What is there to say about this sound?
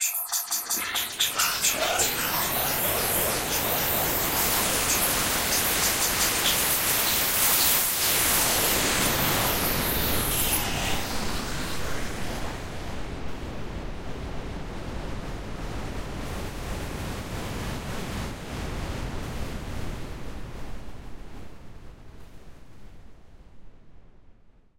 Phase Breath
Very active multiple impact hits dissolving to white noise.
active, breath, grain, impact, noise